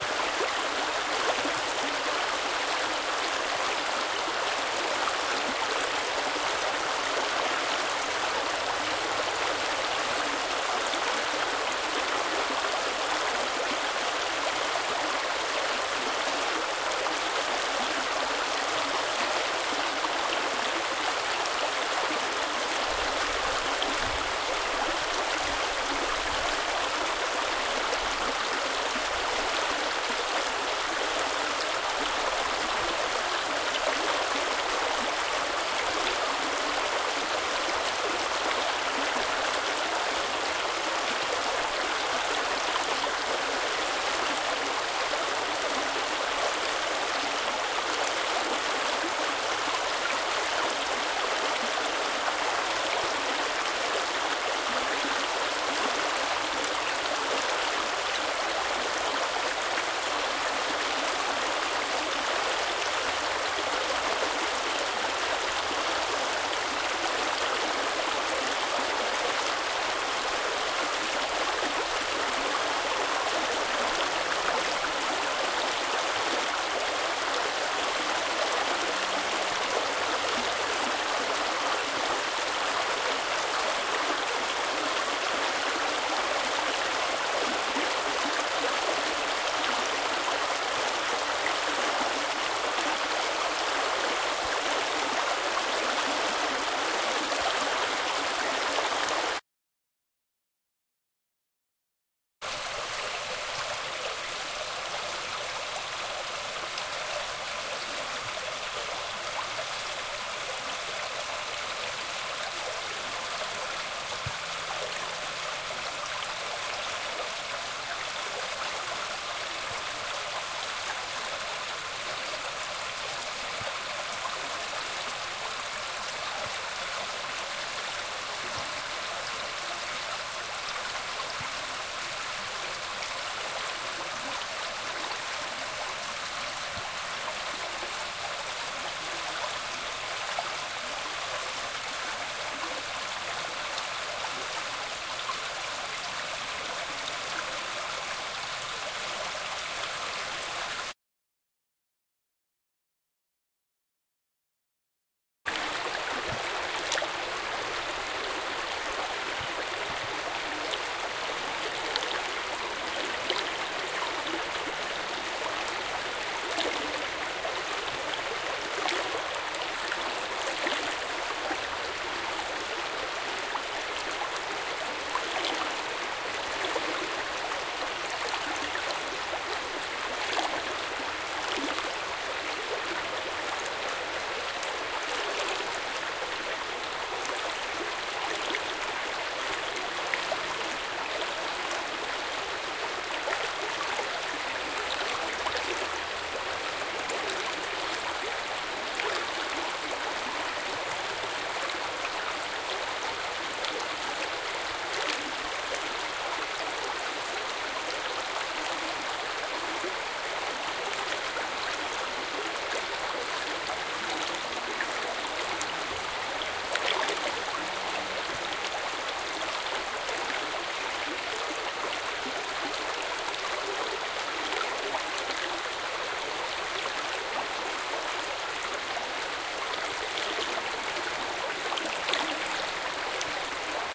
EXT small stream 3POV XY
Quad recording. Front XY from H2. 3 different perspectives of a small stream running in spring. near dusk. 3 POVs are medium, close under wooden footbridge, closeup on water.